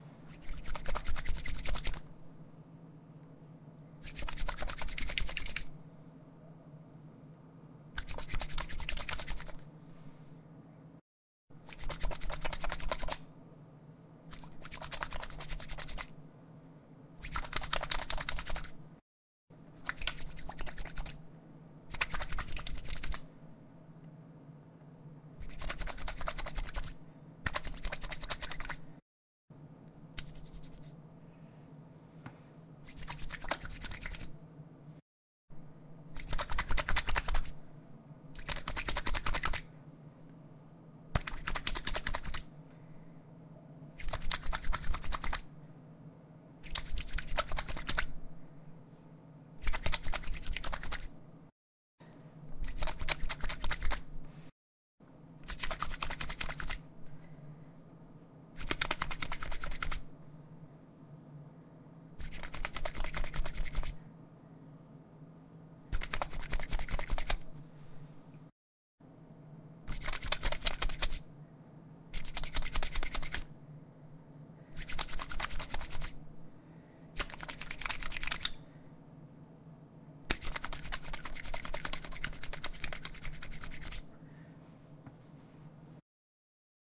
A squishy shakey wet sound of lightly soaped and wet hands being rubbed together very quickly. 27 variations in wetness and "squishiness." Good for scrubbing hands (of course) or a dog shaking water off.